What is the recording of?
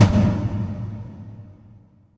Tom with reverb effects processed with cool edit 96.